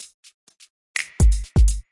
dance hall drum3
Part of the caribbean delights pack, all inspired by out love for dancehall and reggae music and culture.
4-bar, dance-hall, simple, thumping